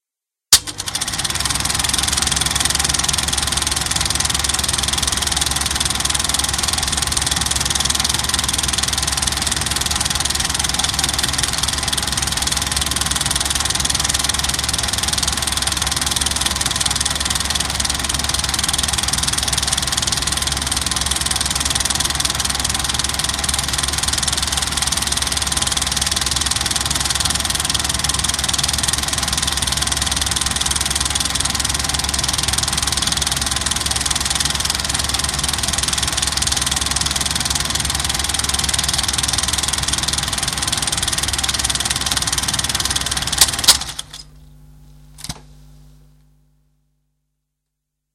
Projector sound 2
This is the audio recording of an 8mm super8 projector which includes start up and switch off.
film, projector, reel